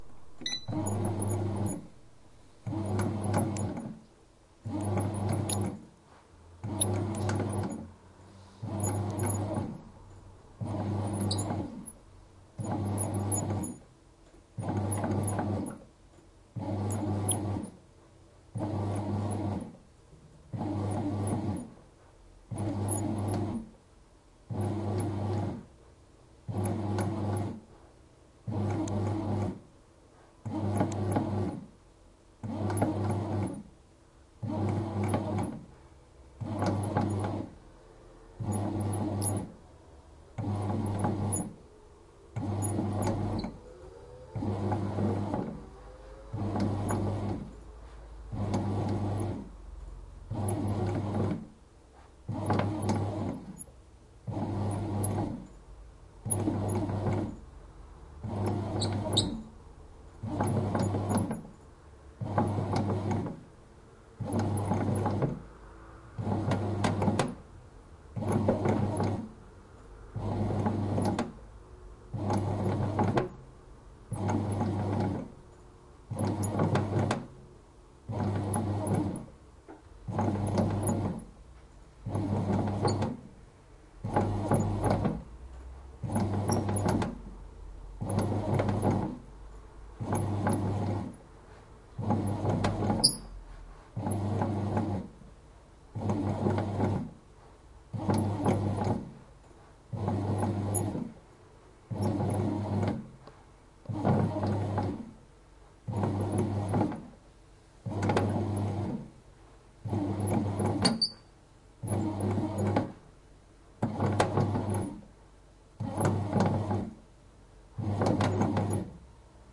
bread machine 1
bread machine initial mix phase
field-recording, machines